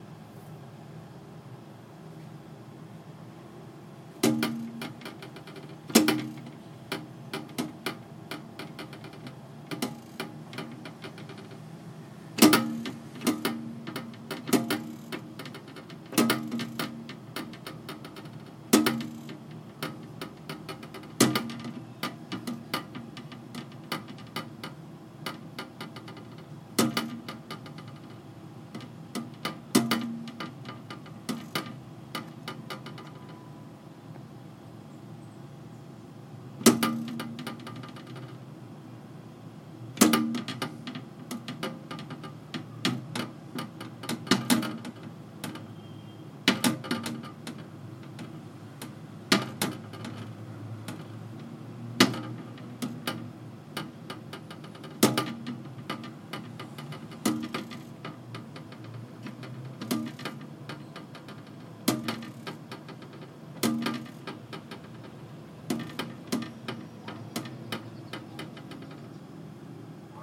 A semi-open window closing and open by the wind blows
Recorded with iPhone 4S microphone